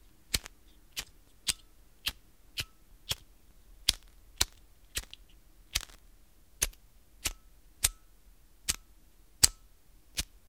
A cigarette lighter failing to ignite